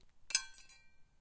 metal falling on stone
It is basically a spatula falling on stone.
recorded with a zoom mic